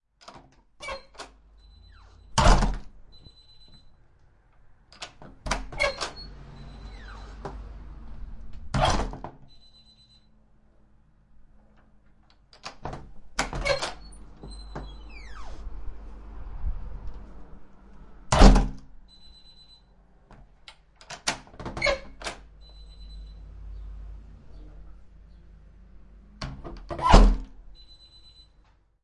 door wood gnarly apartment heavy front door with loose handle latch open close thunk +alarm system beep
alarm, apartment, beep, close, door, front, gnarly, handle, heavy, latch, loose, open, thunk, wood